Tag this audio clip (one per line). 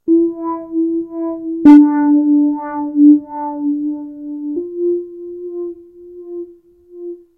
acid
alesis
ambient
base
bass
beats
chords
electro
glitch
idm
kat
leftfield
micron
synth